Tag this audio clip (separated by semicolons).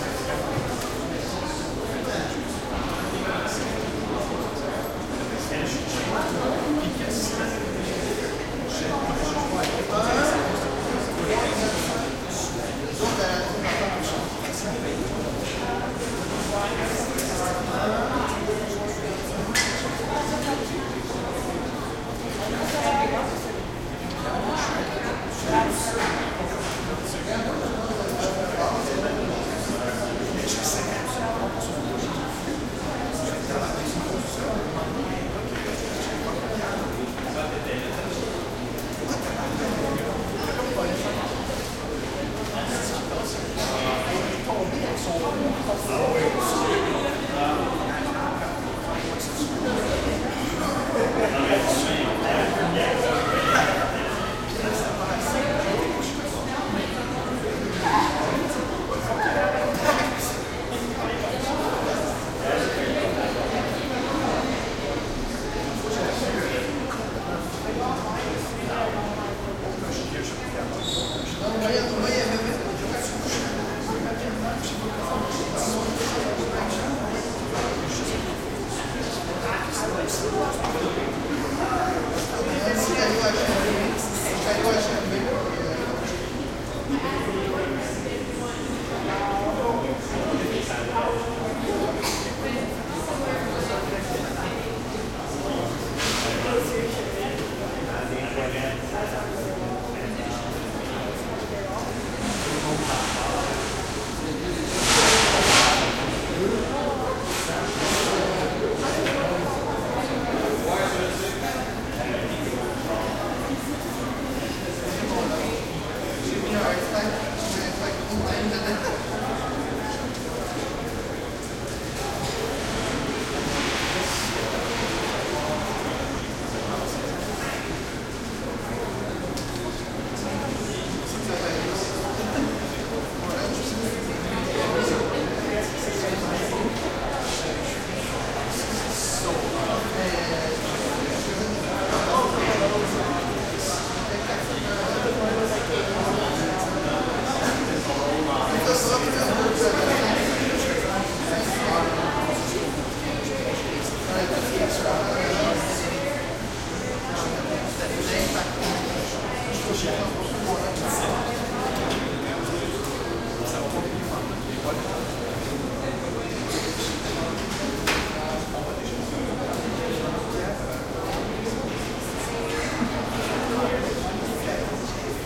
crowd; echo; hallway; int; like; school; small